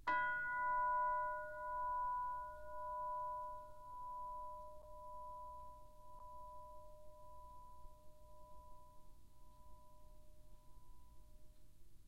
Instrument: Orchestral Chimes/Tubular Bells, Chromatic- C3-F4
Note: B, Octave 1
Volume: Piano (p)
RR Var: 1
Mic Setup: 6 SM-57's: 4 in Decca Tree (side-stereo pair-side), 2 close
music, orchestra, sample, bells, chimes, decca-tree